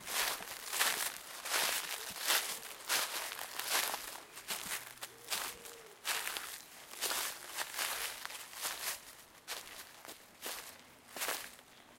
Footsteps / Walking on foliage in a forest
feet, leaves, Footsteps, steps, forest, foliage